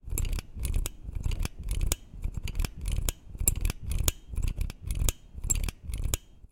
scoop - fake panning L to R
I created two tracks of the same mono recording, and set one track to pan hard left, and the other hard right. I made a fake pan from left to right by cross-fading the two tracks.
machine, mechanical, scoop, squeeze